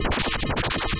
Created with coagula from original and manipulated bmp files. Suspense soundtrack element.
movie, image, synth, ambient, space, suspense